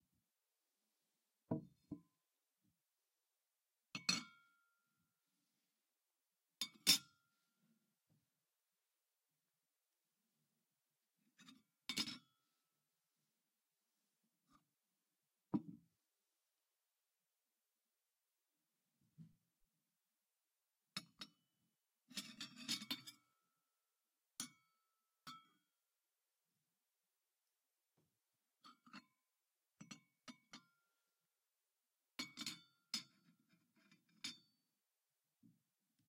Old tea cups and spoon
Old tea cups